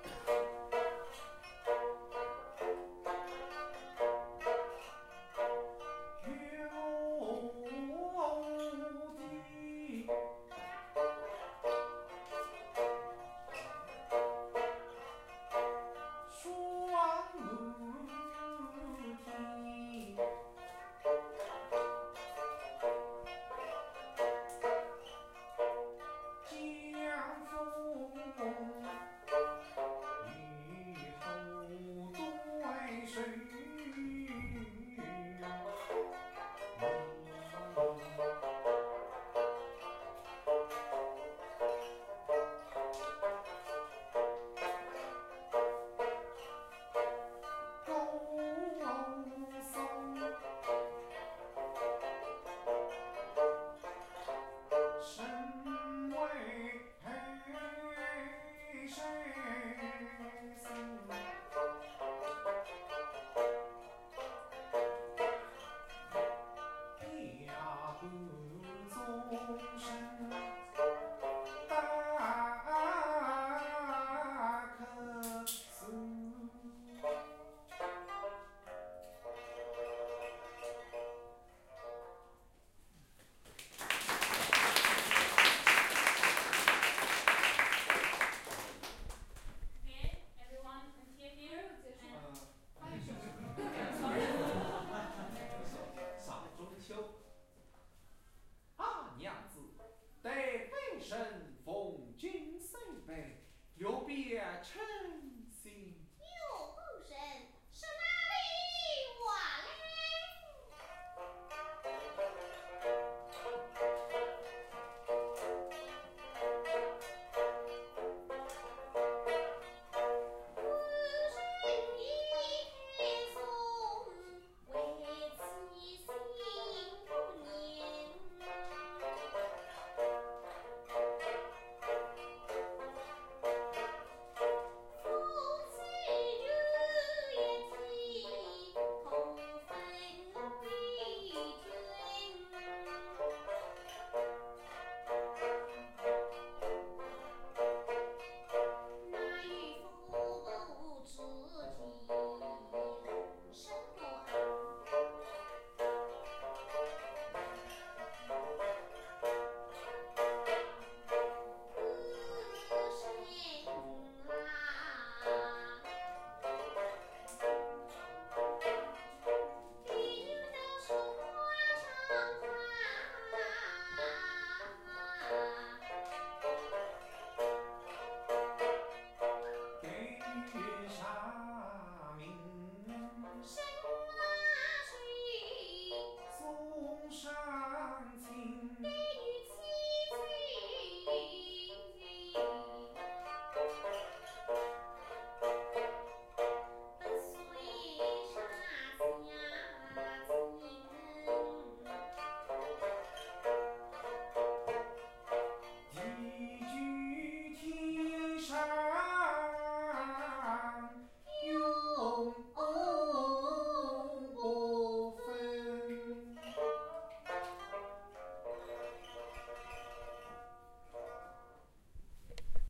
chinese music pipa voice
chinese traditional music recorded in Suzhou
voice, pipa, chinese, concert, music, live